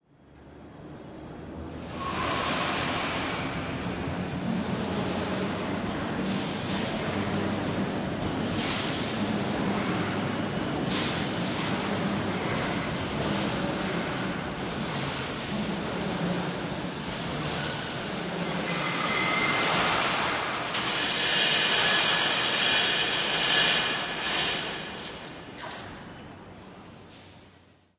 steel factory007
Unprocessed stereo recording in a steel factory.
noise, industrial